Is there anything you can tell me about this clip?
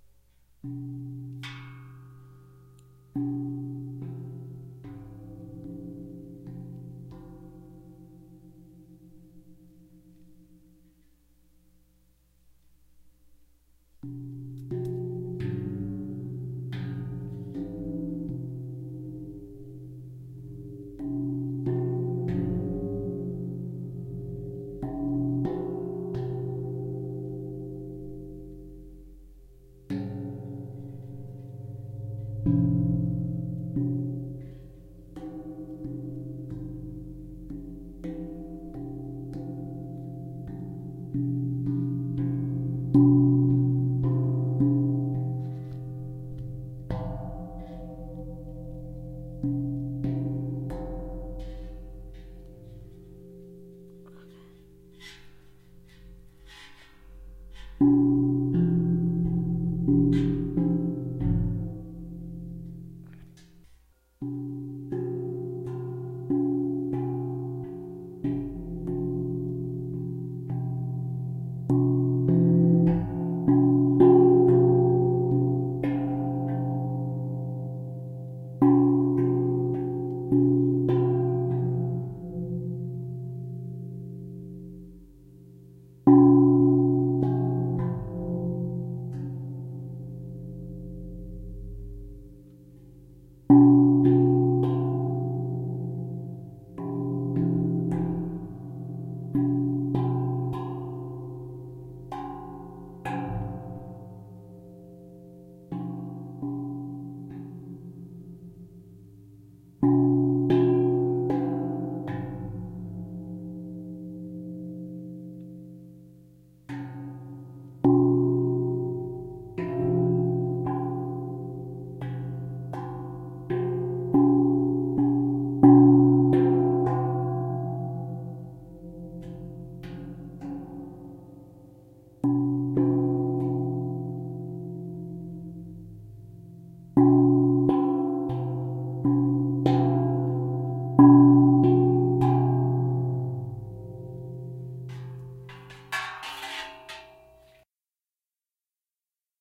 Large Indonesian Gong
Gong, Indonesian, large